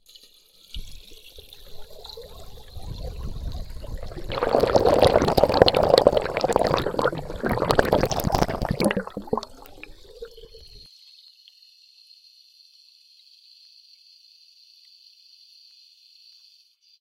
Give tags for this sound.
glug flush transducer water toilet wet piezo

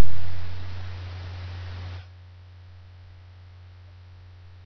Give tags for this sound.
fx noise

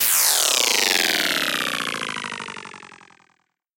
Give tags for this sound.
down effect falling granular sting sweep

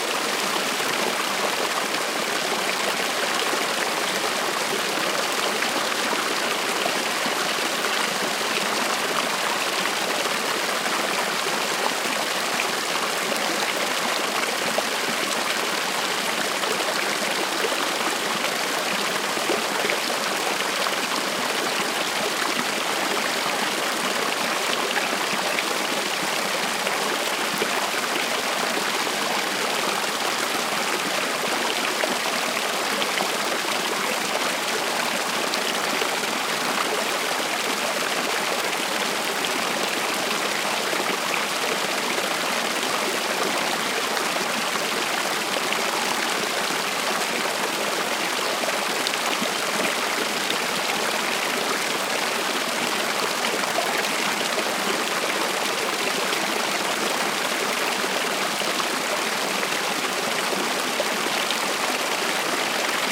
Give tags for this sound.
gurgle nature waterfall

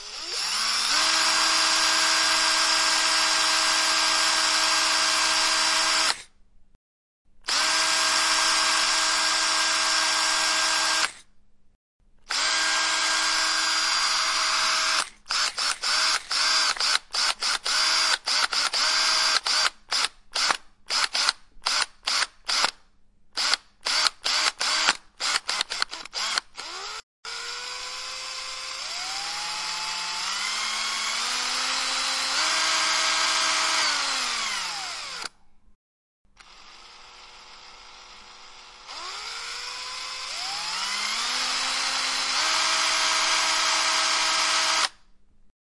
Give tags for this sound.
de drill hand industrial mechanical motor ntg3 power R r26 roland tools

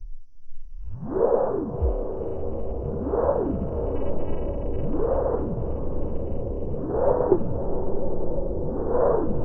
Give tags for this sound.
ufo flying space strange alien sci-fi

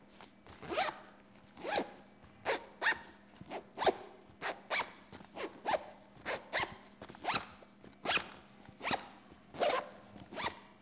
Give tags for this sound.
Joana Joao-Paulo-II Portugal school-case

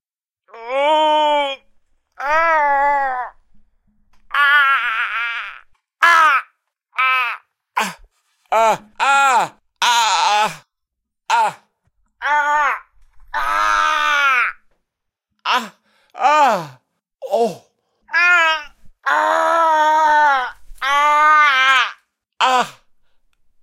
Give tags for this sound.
male torture despair man voice pain groan horror suffering

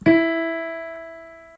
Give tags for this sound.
E Mi Piano

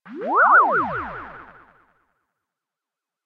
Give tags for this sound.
effect game jingle space

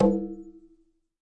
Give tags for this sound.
Single Percussion Hits Drums Conga

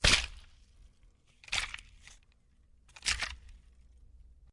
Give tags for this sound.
bottle water plastic

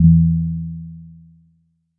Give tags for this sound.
electric-piano multisample reaktor

